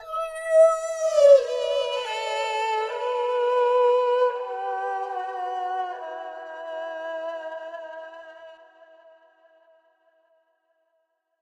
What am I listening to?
Angelic voices, strings, and synthesised sounds morphed together to produce a strange plaintive sound.I made the sounds in this pack as ethereal atmospheres/backgrounds/intros/fills. Part of my Atmospheres and Soundscapes pack which consists of sounds designed for use in music projects or as backgrounds intros and soundscapes for film and games.